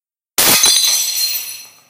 Crash porcelain
porcelain, broken, breaking